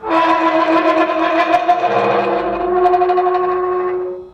Heavy wrought-iron cemetery gate opening. Short sample of the shivery, warbling groaning sound of the hinges as the gate is moved. Field recording which has been processed (trimmed and normalized).
gate, hinges, groan, squeak, iron, creak, metal, warble